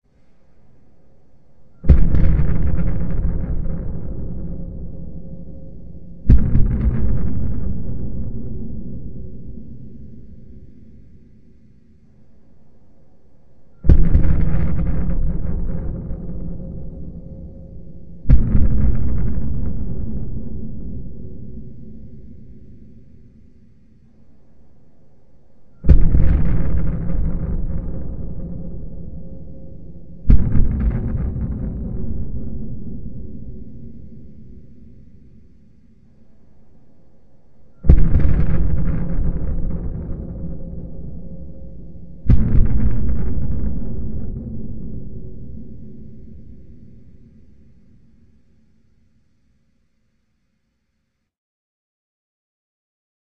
A percussive sound effect created using a Samson USB Studio microphone, an empty cardboard wrapping paper roll, and Mixcraft 5.